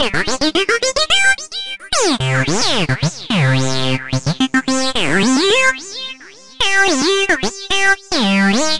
Stezzer Acid Blips 109 bpm
An acid break I made in free tracker, Jeskola Buzz, using it's in built acid generators, highly recommended - little delay added :)
109, acid, bass, beeps, blips, bpm, break, buzz, jeskola, sequence